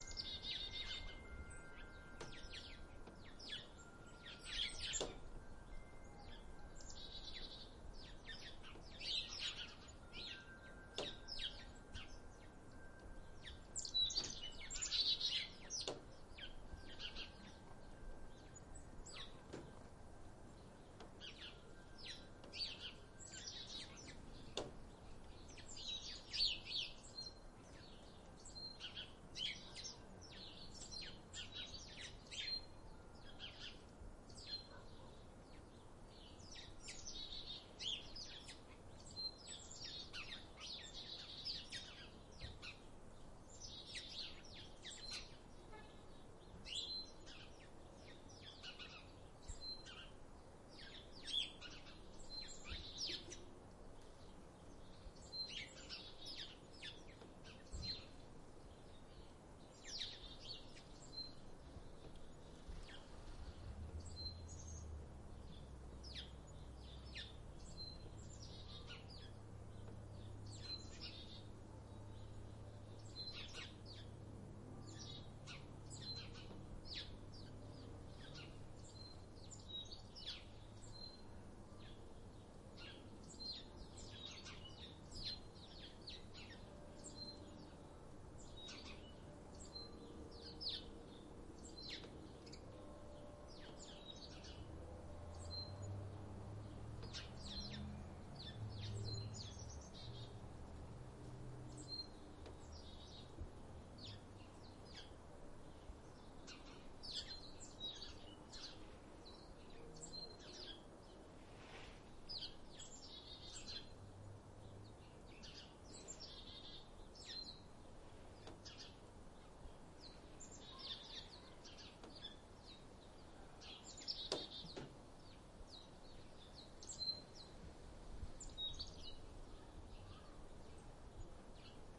recorded in my backyard in early spring